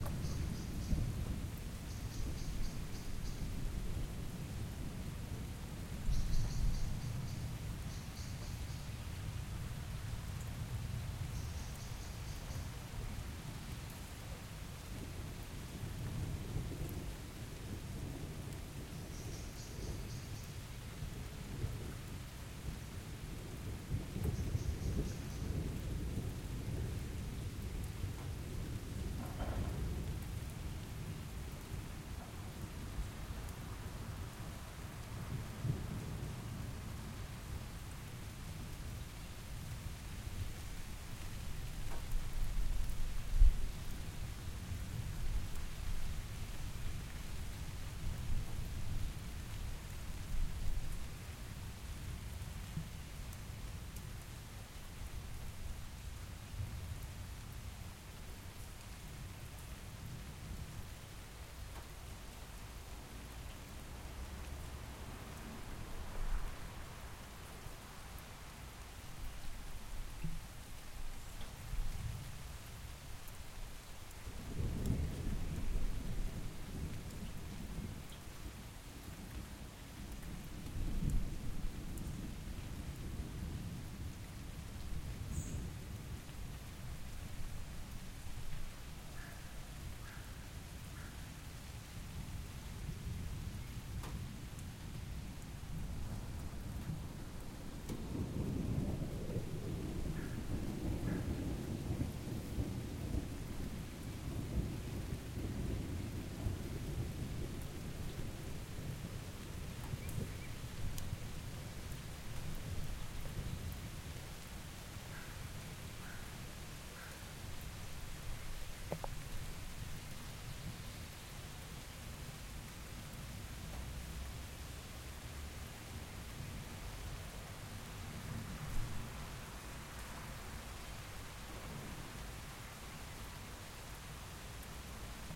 Summerstorm Munich4 LR
Awaken by a summerstorm at 5 in the morning -- I used the opportunity to record some nice rain with thunders in the back...Hope it is useful. Adapted the controllers sometimes in beetween - so listen carefully and don't be surprised by some volumeshifts...
Sommergewitter, thunder, noir, film, normal, thunderstorm, Summerstorm, rainstorm, Donner, field-recording, rain, Regen